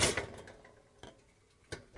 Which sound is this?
Metal hit and bounce
buzz, latch, mechanical